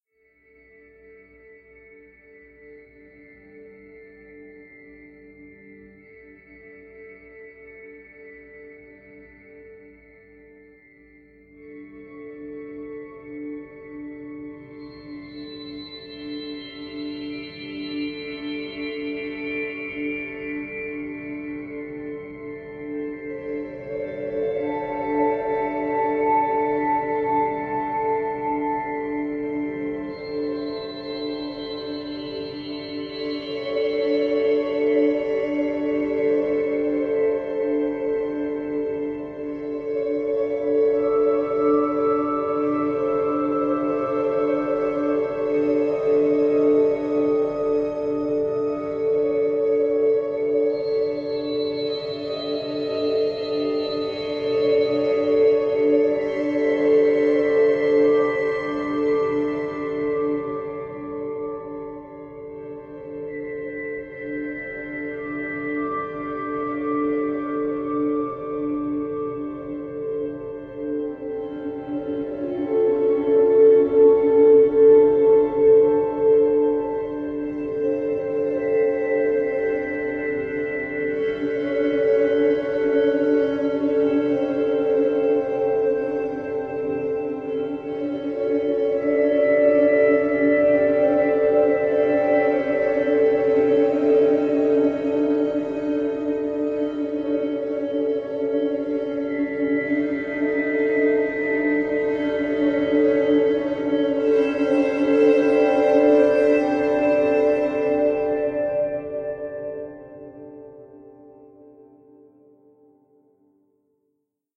Mysterious Ambiance Music
Atmospheric mysterious ambiance track for many uses.
High quality - suitable for professional use.